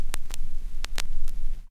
Vinyl Surface Noise 02
Some quiet, understated vinyl crackling.